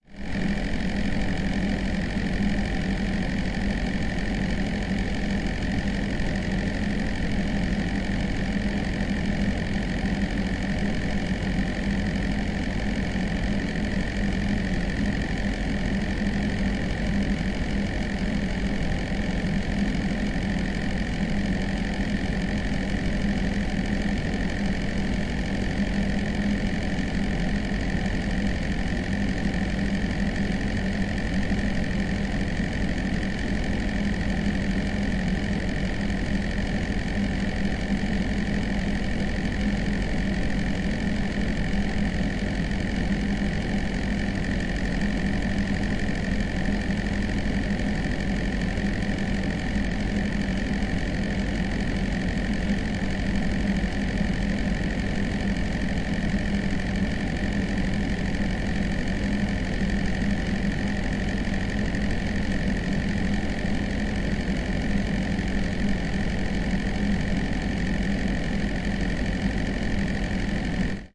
bathroom vent closeup D100 AB
ambience, buzz, ceiling, motor, room, room-tone, vent